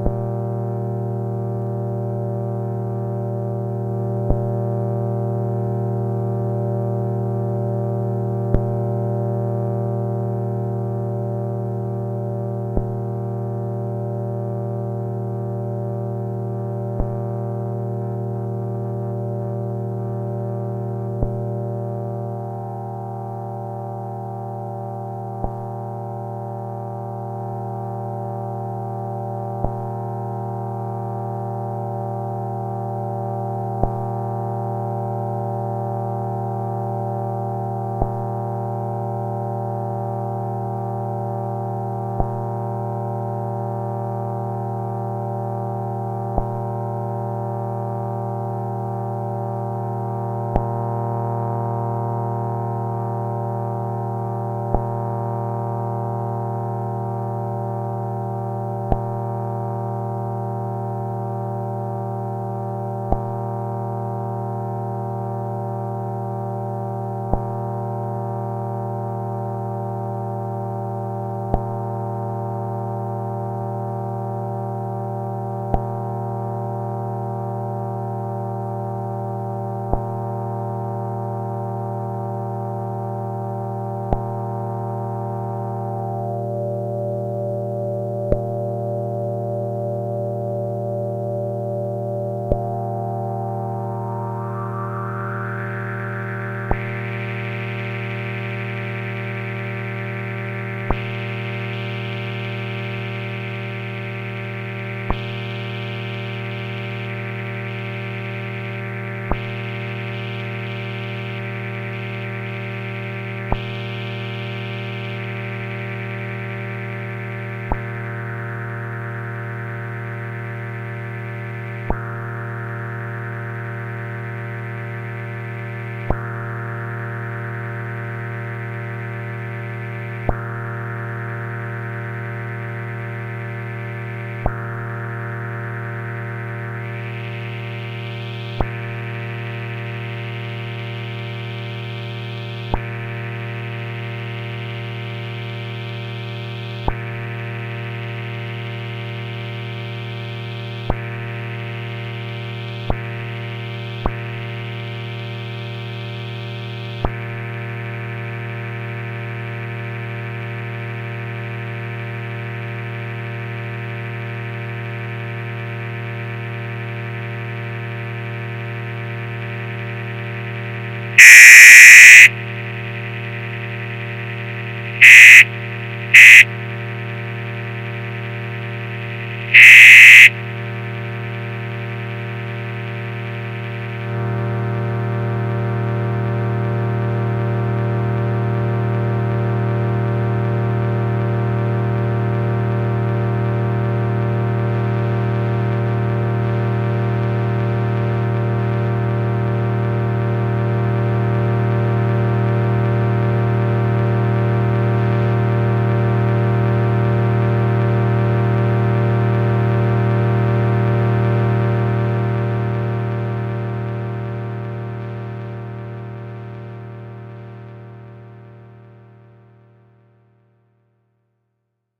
impro recorded wt redsquare
analo semi modular synth redsquare v2 (analog solutions)